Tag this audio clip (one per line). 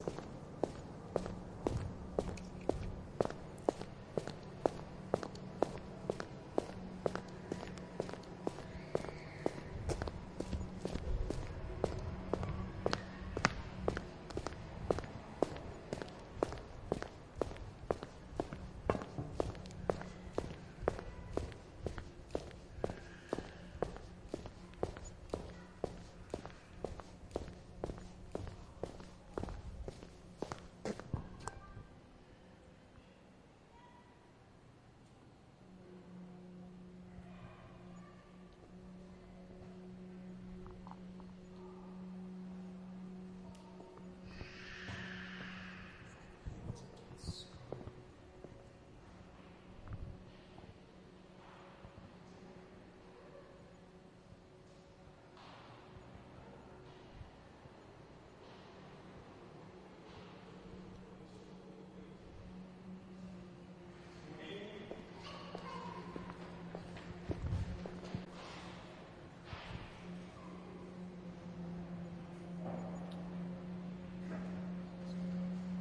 car
steps